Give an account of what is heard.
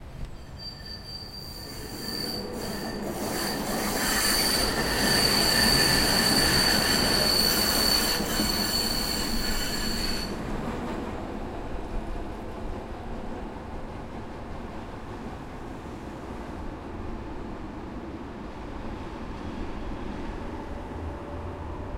Tram Budapest
Zoom H1. Tram in Budapest passing by. Background traffic noise.
streetcar, transport